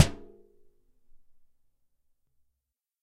Banging on a disposable aluminum cookie sheet
pot, pan, crunch, metal, clang, kitchen, bang, aluminum